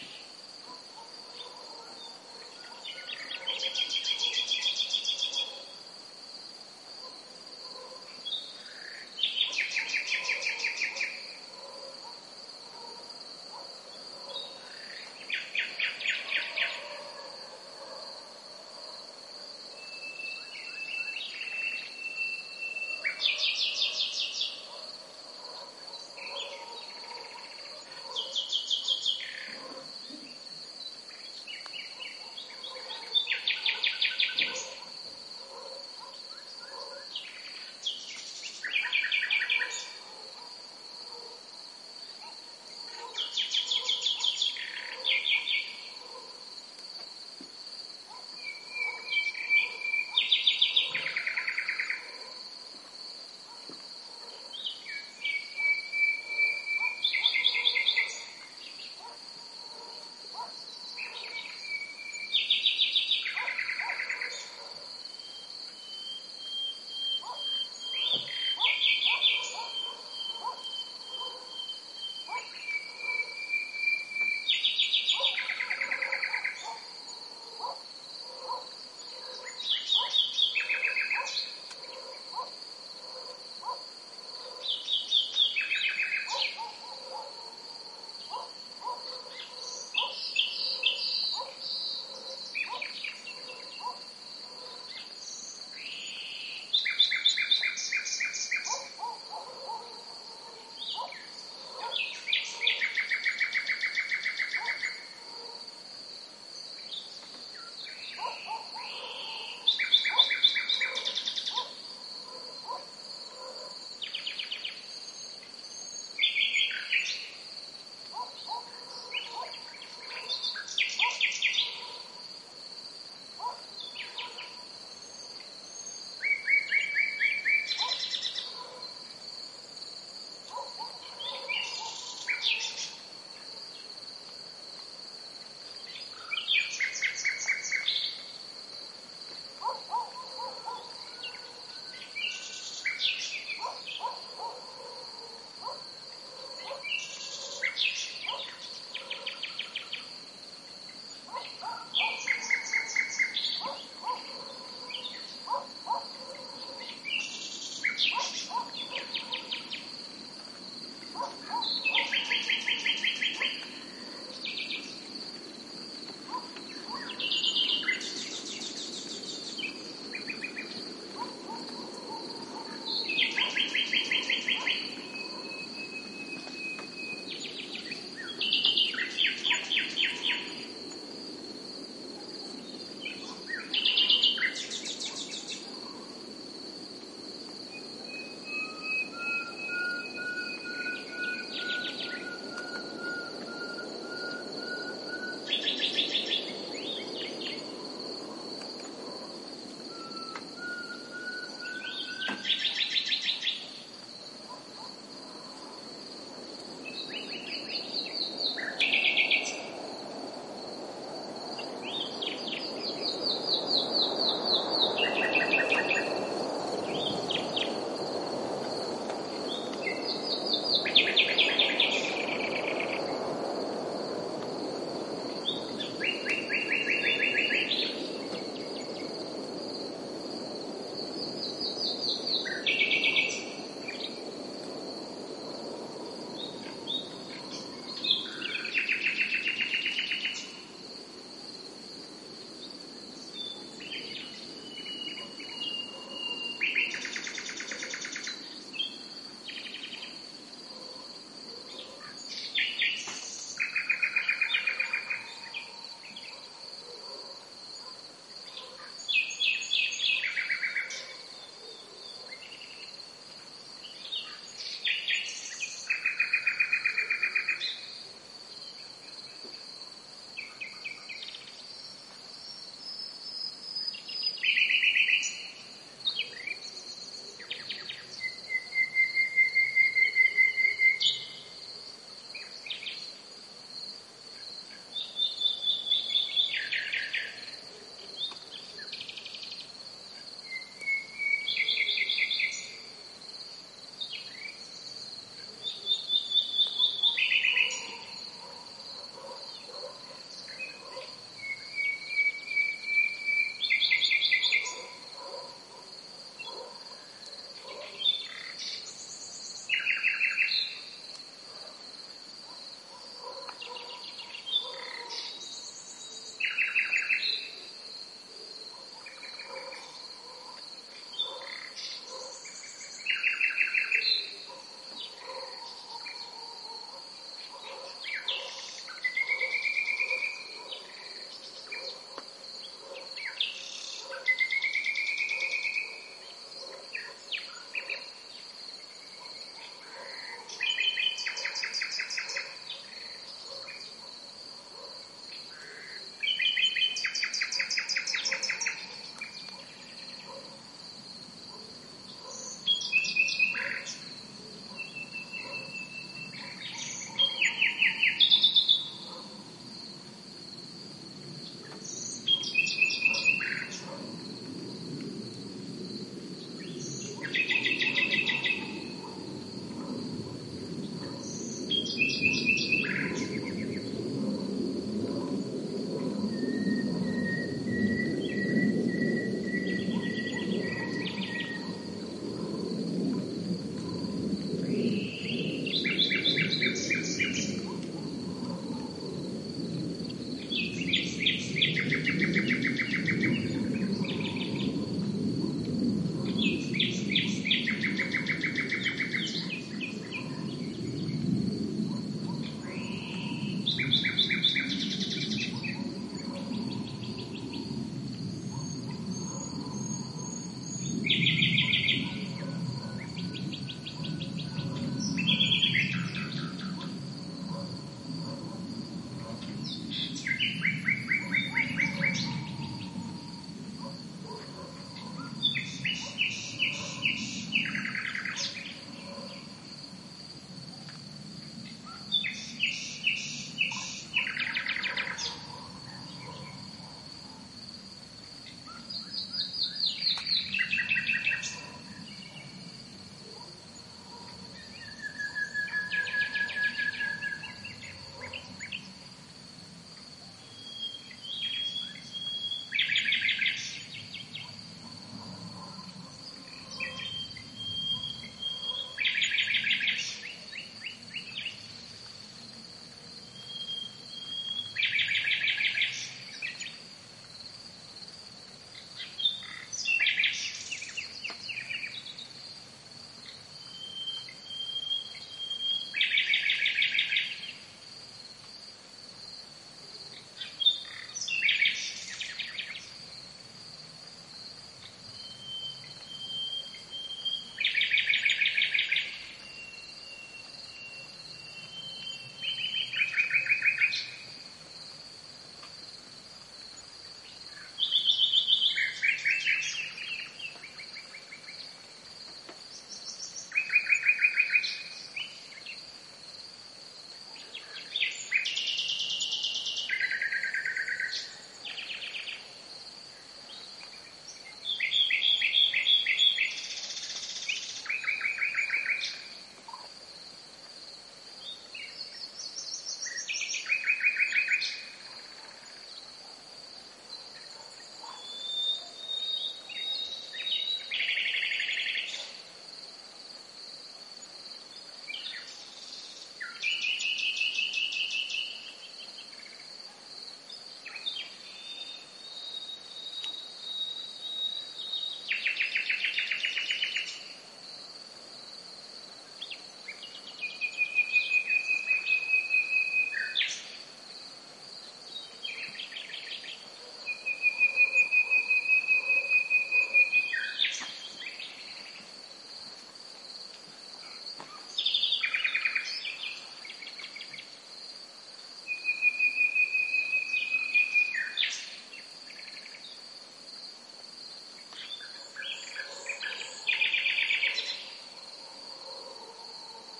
Night ambiance near a small creek in the mountains on a Mediterranean area at 600 m asl during spring. Basically: Nightingale singing, crickets chirping, distant dog barkings, wind on a Pine tree (at 3 min) and a jet passing hight (at 6 min). Primo EM172 capsules inside widscreens, FEL Microphone Amplifier BMA2, PCM-M10 recorder. Recorded in the surroundings of Bernabe country house, near Carcabuey (Cordoba, S Spain)